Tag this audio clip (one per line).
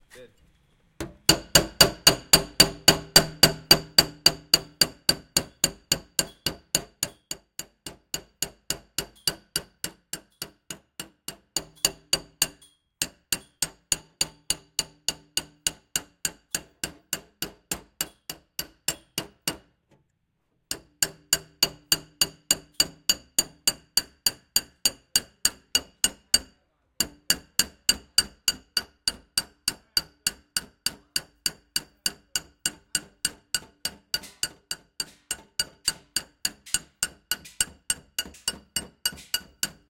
repair
hammer
mallet
construction
work